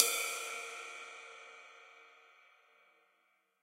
Multisampled 20 inch Istanbul pre-split (before they became Istanbul AGOP and Istanbul Mehmet) ride cymbal sampled using stereo PZM overhead mics. The bow and wash samples are meant to be layered to provide different velocity strokes.